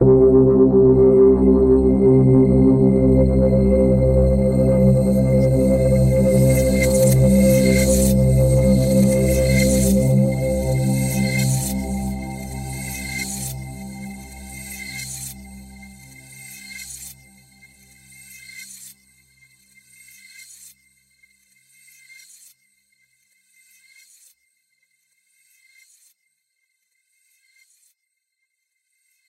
A very dark and brooding multi-sampled synth pad. Evolving and spacey. Each file is named with the root note you should use in a sampler.
ambient, dark, granular, multi-sample, multisample, synth